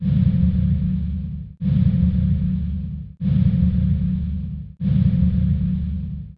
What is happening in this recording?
strange noises in engine
Processed car engine.
Engine, Field-recording, Sound-design